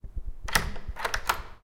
Prison Locks and Doors 16 Door handle turn
From a set of sounds I recorded at the abandoned derelict Shoreditch Police Station in London.
Recorded with a Zoom H1
Recorded in Summer 2011 by Robert Thomas
doors, latch, lock, locks, London, Police, Prison, scrape, Shoreditch, squeal, Station